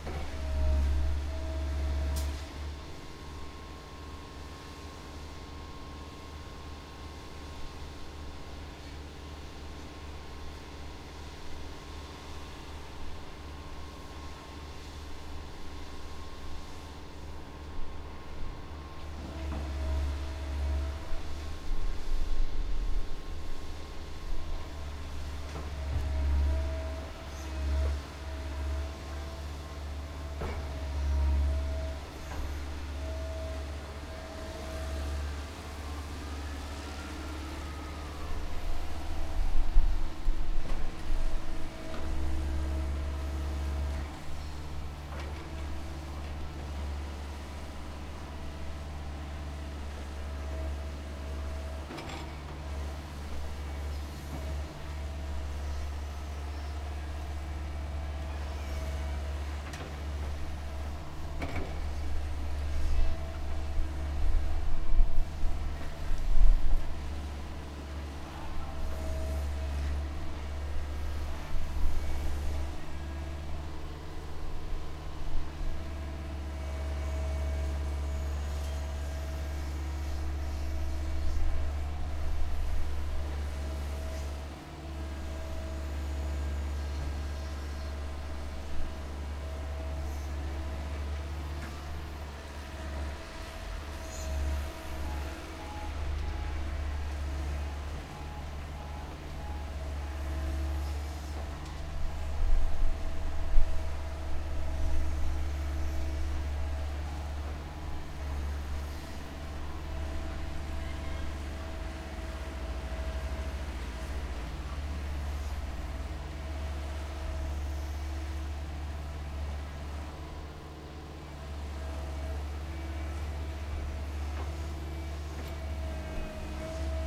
A digging machine in front of an appartment plowing the ground. Recorded using Røde NT1 with wind jammer.